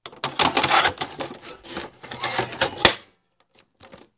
Recording of loading a cartridge into a Nintendo Entertainment System, pushing down, closing the lid and hitting the power button. Recorded with voice memo app on a Samsung Galaxy S4.